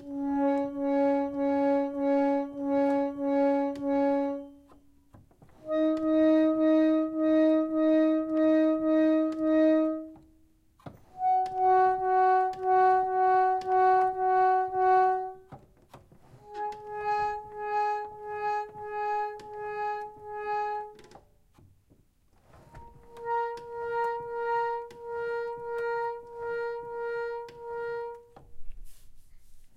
Recorded using a Zoom H4n and a Yamaha pump organ, all the black keys between middle C (C3) and C4.